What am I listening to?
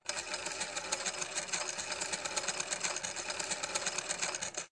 Engranaje, telefono antiguo, banda transportadora